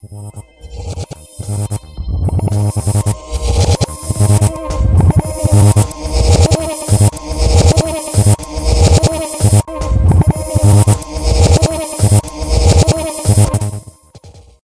a nightmare of bumblbeezzzz
A sound reminded me of my previous fear of getting stung by a massive bumblebee buzzing 8 yrs old I got stung by it at the end of the day but the sound of it I found terrifying This i cobbled together for very short low frequency loop just enhancing along the way etc etc I am ashamed to say I cant recall the steps to make same on Audition
unreal, synthesised, effects, sound